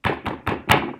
Smashing Table Rage
The sound of someone smashing a table
rage, table, smash